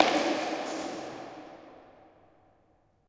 One of a pack of sounds, recorded in an abandoned industrial complex.
Recorded with a Zoom H2.